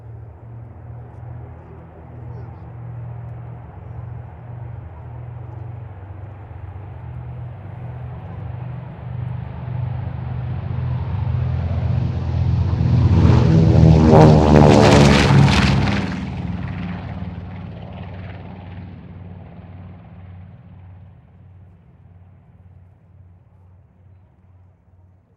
B-25 bomber recorded at air show taking off.